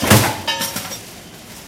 die single 2
die, industrial, machine, factory, field-recording, metal, processing
die, factory, field-recording, industrial, machine, metal, processing